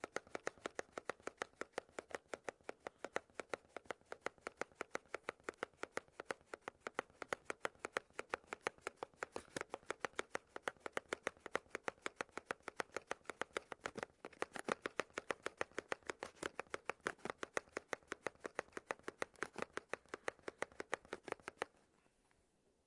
mySound Piramide Ilker
Sounds from objects that are beloved to the participant pupils of the Piramide school, Ghent. The source of the sounds had to be guessed.
calculator-box, BE-Piramide, mySound-Ilker